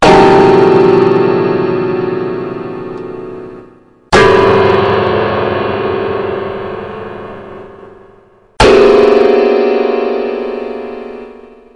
Pressed down random keys on my electronic piano really hard.
I've recorded 3 variations.